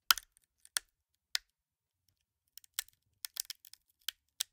nut cracking 4
cracking, nut, nutcracker, opening